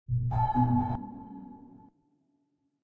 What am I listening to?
I used FL Studio 11 to create this effect, I filter the sound with Gross Beat plugins.